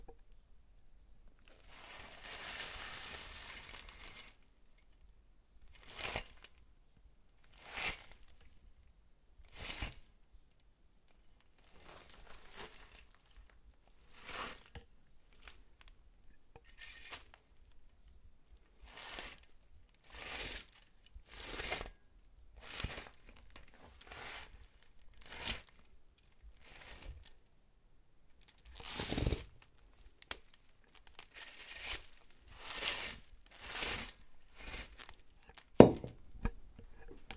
bottle to sand
the old bottle again, now over the sand
rope, old, stones, warehouse, sand, sticks, bottle, wine